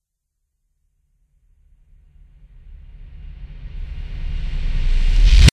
Fade In Sound Effect(5)
Here is a very different fade in effect that I created using "jobro's" sound effect. It is one of my first fade in effects that sounds this way(I can't really describe it).
Edit, Film